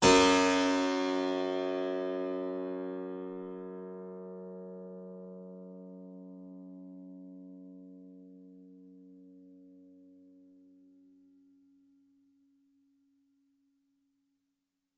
Harpsichord recorded with overhead mics